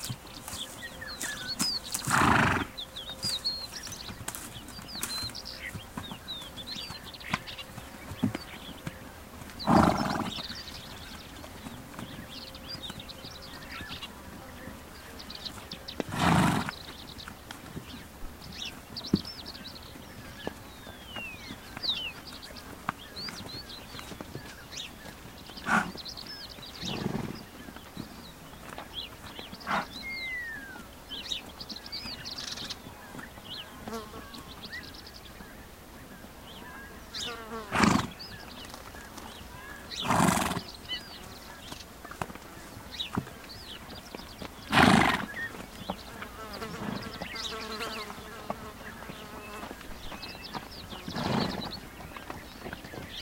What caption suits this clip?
20060419.horse.snort.02
diverse sounds made by a horse while foraging, including several snorts. Birds in BG /sonidos varios hechos por un caballo mientras come, incluyendo varios resoplidos
birds, snort, spring, ambiance, nature, field-recording, horse